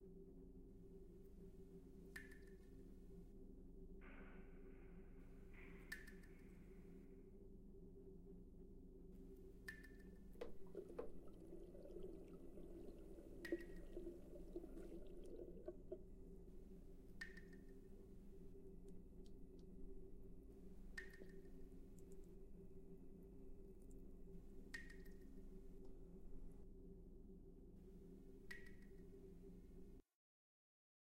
A quiet, scary background of the bathroom in a spaceship.
bathroom, drip, plumbing, restroom, scary, toilet, water